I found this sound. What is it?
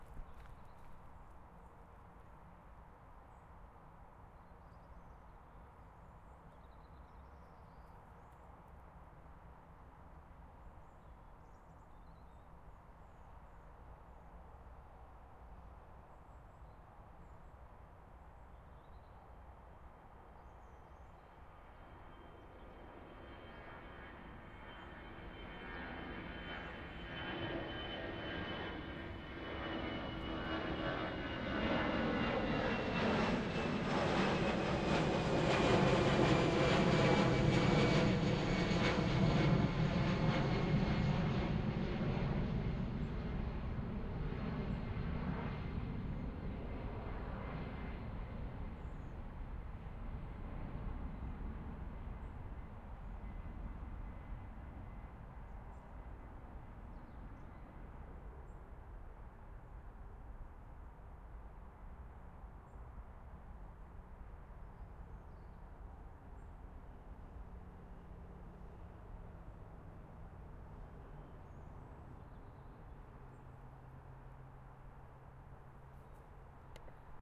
Distant-Traffic-Woodland-Birds-and-Jet-Airliner
Woodland Birds chirp and Tweet as Jet Airliner flys overhead
Distant, Traffic, Woodland-birds